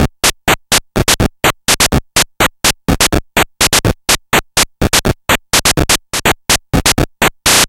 Atari 2600 Beat 2
Beats recorded from the Atari 2600